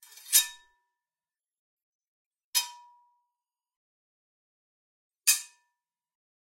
African metal blade for farming
Some impacts made with a African metal blade used for farming and a piece of rock.
rock, Impacts, metal